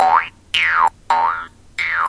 jump sound ver 2
better version of my jump sound. Made this with jew's harp.
qubodup has cleaned one of the jump sounds so check it out here
bounce, cartoon, jews-harp